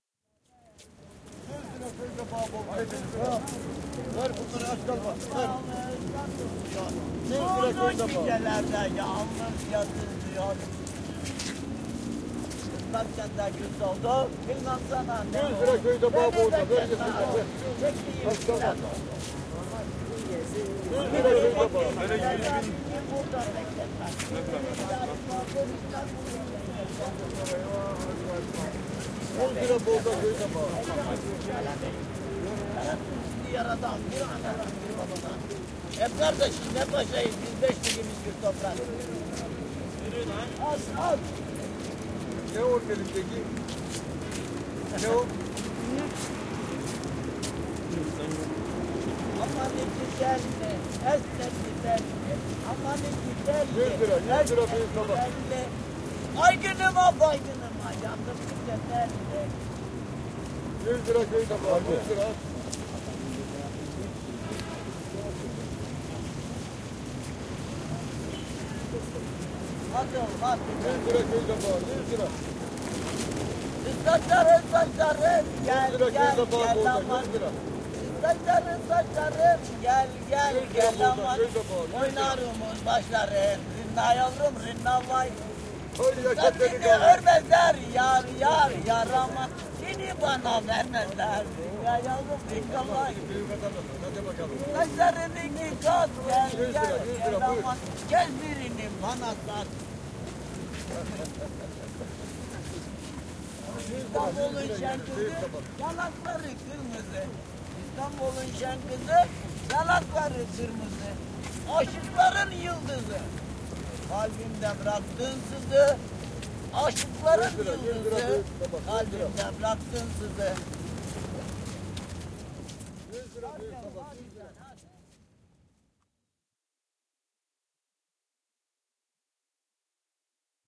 South African University Ambience EXT [University of Johannesburg]